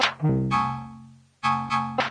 A kind of loop or something like, recorded from broken Medeli M30 synth, warped in Ableton.